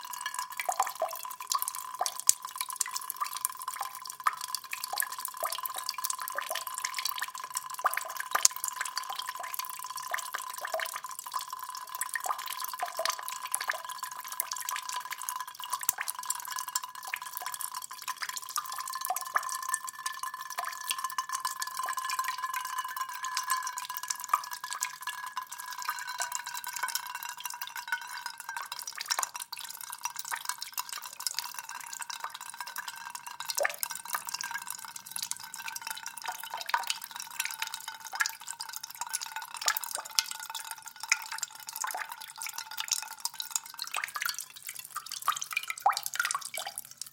20110924 dripping.mono.17
dripping sound. Sennheiser MKH60, Shure FP24 preamp, PCM M10 recorder
dapness, dripping, faucet, leak, rain, tap, water